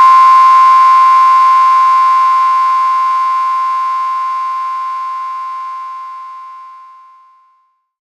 73 C6 Sine, hand made
Some C6 1046.50Hz sine drawed in audacity with mouse hand free, with no correction of the irregularities. Looping, an envelope drawed manually as well, like for the original graphical "Pixel Art Obscur" principles, (except some slight eq filtering).
audacity, beep, C6, computer, electronic, experimental, glitch, handfree, harsh, lo-fi, mouse, noise, sine, sine-wave, waveform